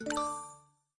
Bonus Points 1 2
Created using layering techniques with the Synth1 virtual synthethizer. Mixed and edited in DAW.